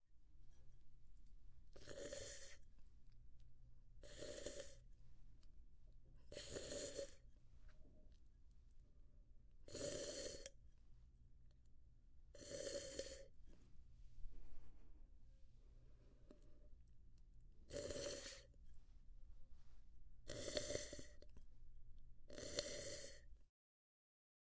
Sip of Coffee